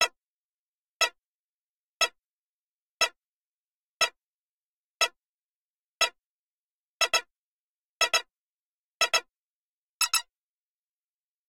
Synth ui interface click netural count down ten seconds
ui, netural, Synth, down, ten, countdown, count, click, interface, seconds